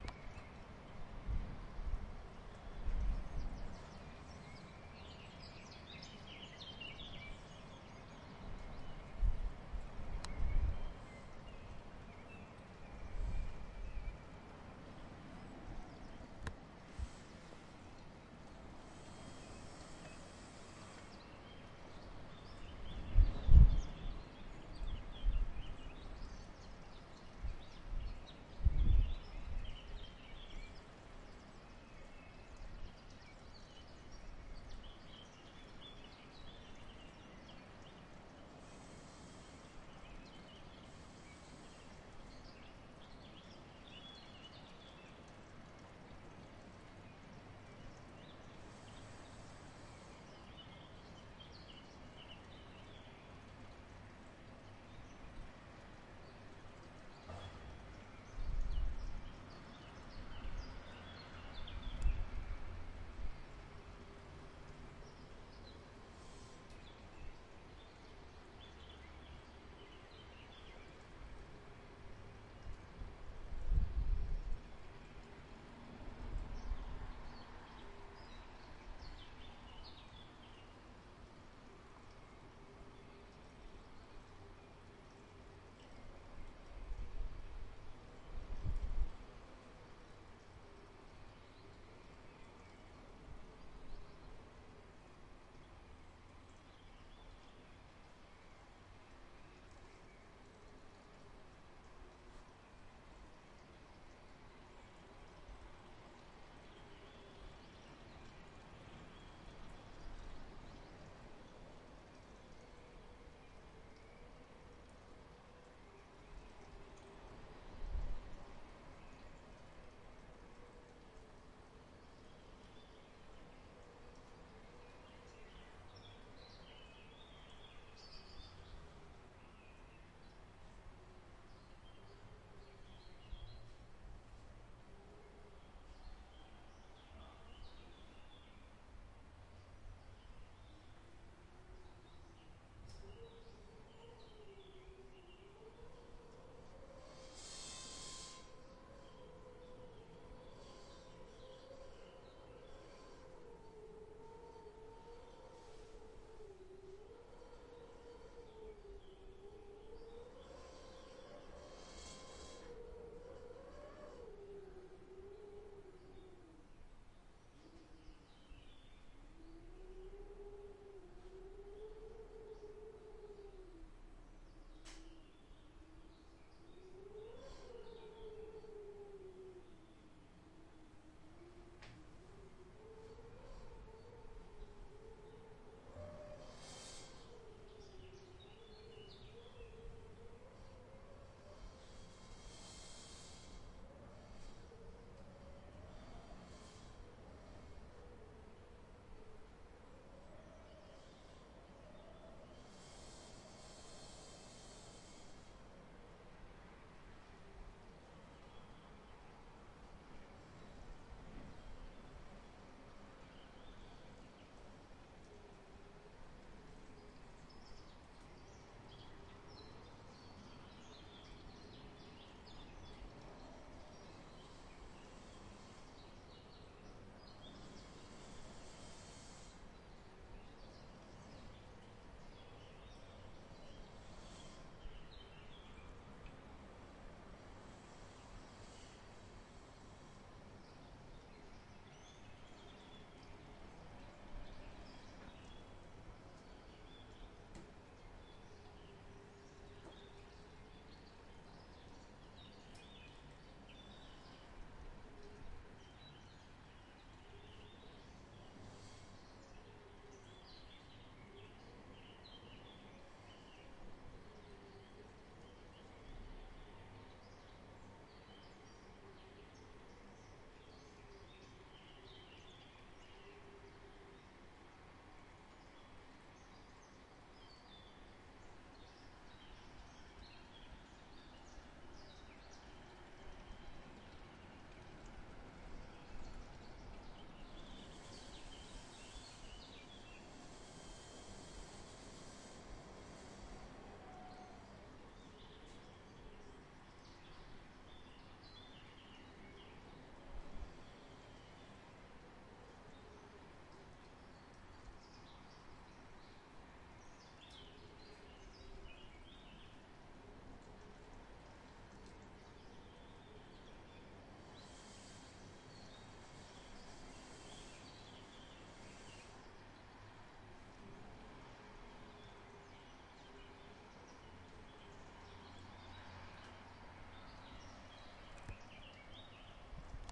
Birds in Cuenca, Spain
Birds and a motor running in the background in Cuenca, Spain.
H2ZOOM
Mix-pre 6, Senheisser MKH 416 P48.
Cuenca, morning, motor, Spain, Birds